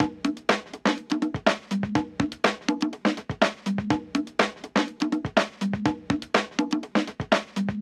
Remix Congas
Cool Conga Fill at 123 BPM
auxillary, beat, drum, india, kit, percussion, snickerdoodle